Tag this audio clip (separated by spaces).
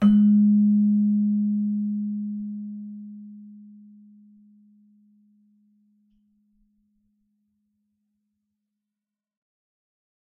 chimes celesta keyboard bell